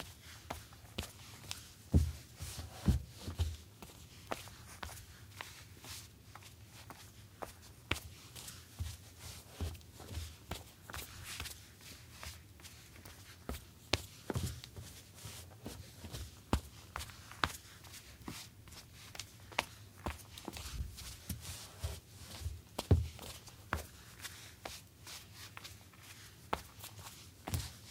Footsteps, Solid Wood, Female Socks, On Toes, Medium Pace
footsteps, wood, female, socks, solid